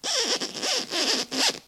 Creepy Shoe Sound
shoe, creepy